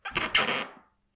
Wood Creak Single V9
A single creaking wooden floor step. This is one of multiple similar sounds and one longer recording with 4 creaks in the same sound pack.
walk, squeaking, timber, squeak, creaking, creak, stepping, step, floorboard, bending, wood, squeaky, bend, creaky, walking